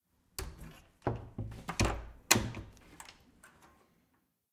Puerta cierra
cerrar, entrar, Puerta